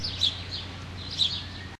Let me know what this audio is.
washington castlebirds
Birds singing by the castle-like Smithsonian building that has the visitor information on the National Mall in Washington DC recorded with DS-40 and edited in Wavosaur.
field-recording, vacation, road-trip, summer, birds, travel, washington-dc